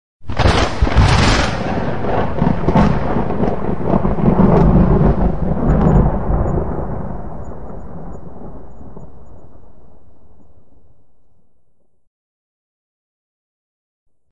Thunder Clap 4
Single thunder clap.
strike, thunder-clap, Thunder